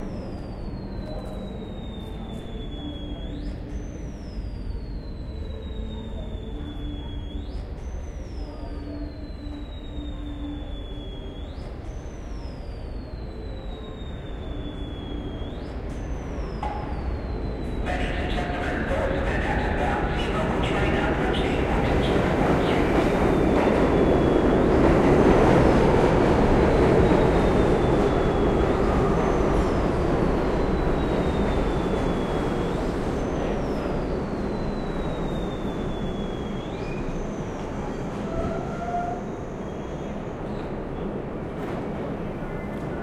Subway Station Amb 06
Subway station ambience with squealing PA speaker, train arrival
field-recording, H4n, MTA, NYC, subway, Zoom